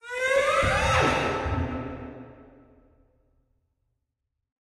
An effected violin.
fx, violin